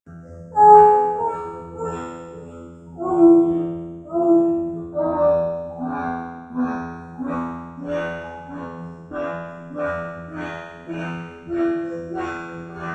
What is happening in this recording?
Robot Chant Loop
Female voice robotized - several plugins. Done in Wavelab.
female, robotized, singing, voice